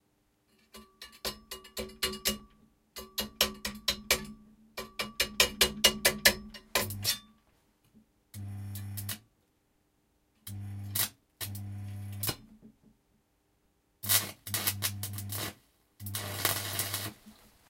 Welding 3 unsucesfull
Welding sounds made by welding with the electric current.
jump, weld, work, spark, power, welder, noise, electric, welding, electrode, powerup, metal